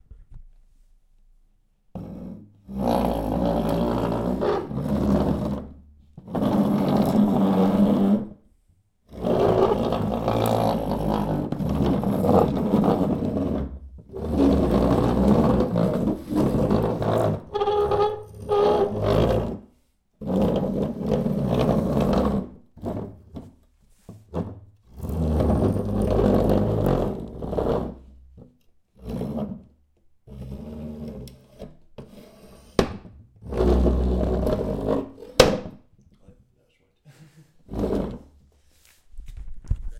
table scrape
scraping and banging tables.... the thing we do.... Recorded indoor with a zoom H4 and a sennheizer long gun microphone.
scrape, table